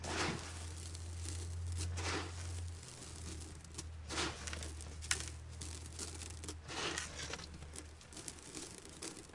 sand sift on sand FF663
sand on sand, sand, sifting, sift,